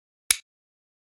Different Click sounds